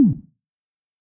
Quick Beep High to Low
Made in Audacity - Chirp 300hz to 100hz, added reverb
digital, videogame